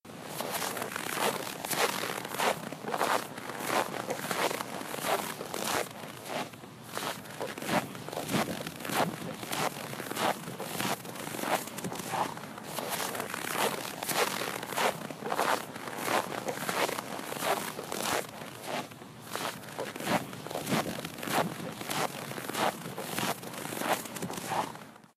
A person walking on a thin layer of snow, making a crunchy sound. Recorded using an iPhone.
crunching, footsteps, walk, walking, snow